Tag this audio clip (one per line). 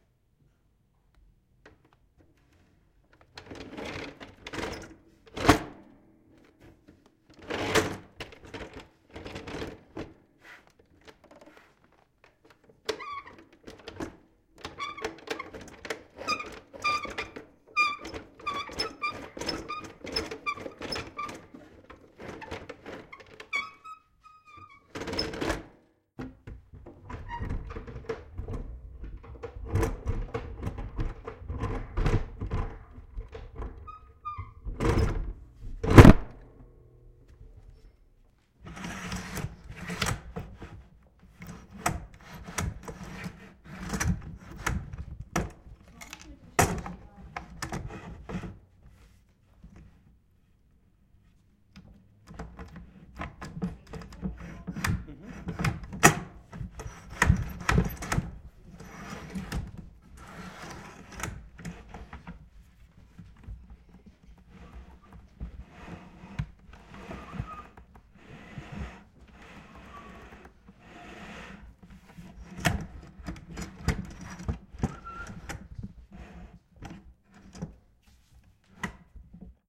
valve
spring
extend
industrial
mechanism
trap
woodworking
loaded
clack
furniture
mechanical
click
contraption
steampunk
conception
object
curious
spring-loaded
click-clack